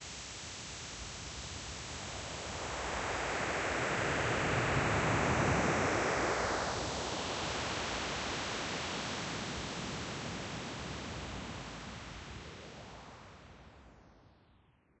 Psychedelic space sound created with coagula using original bitmap image.
synth, trip, space, rush, ambient